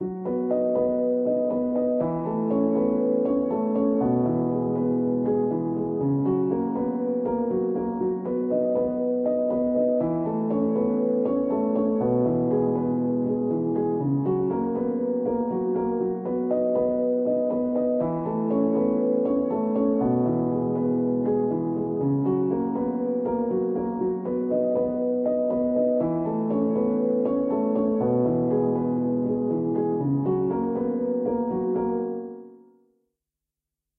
Piano loops 056 octave short loop 120 bpm
reverb, samples, loop, bpm